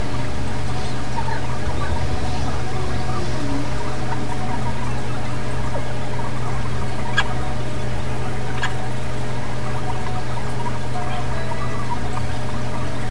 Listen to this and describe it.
I was recording outside my bedroom window while eating dinner in the living room, then I came back in here to see if I got anything interesting. I was zooming through the recording at 5x speed when I came across this bit of incidental pareidolia. I'm not sure what was going on, maybe the neighbors' stereo, but I don't remember hearing it, it's much louder in the living room so I should have. Whatever it was came out as a little distant choppy 2-notes alternating melody when played at 5x speed, which suddenly gets louder and solid as you hear the lower note followed by a third lower note. These 2 louder notes sound to me like someone singing the words all day. This would lead me to believe the original tones have some light harmonics I don't hear at normal speed. After which it goes back to the softer stuttering 2-note alternating thing from before. It doesn't sound like much at all at normal speed.